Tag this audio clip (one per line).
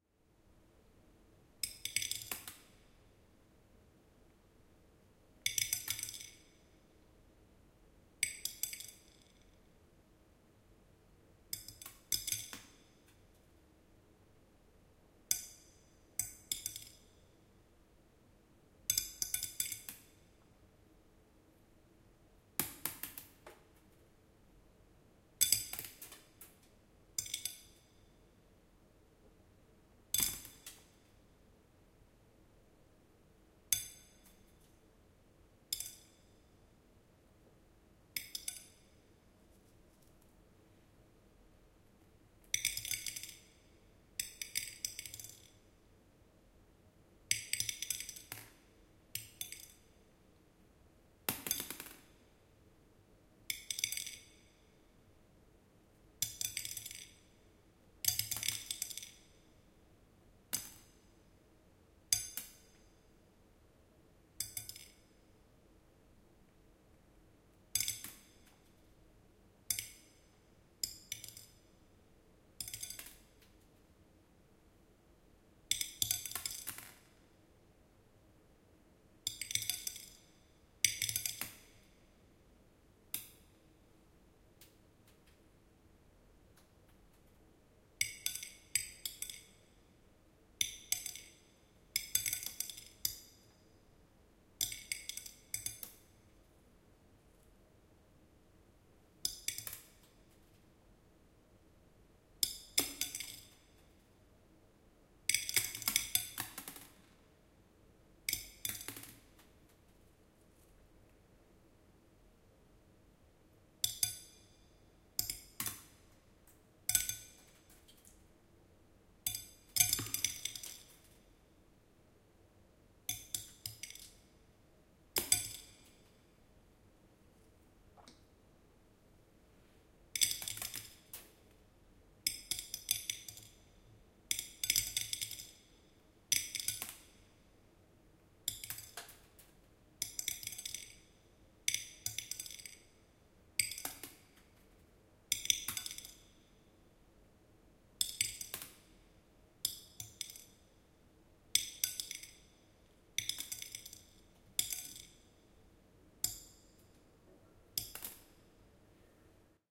drop
plate
rice